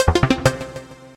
I made these sounds in the freeware midi composing studio nanostudio you should try nanostudio and i used ocenaudio for additional editing also freeware
desktop, event, intro, effect, sound, click, startup, bleep, bootup, game, blip, sfx, application, clicks, intros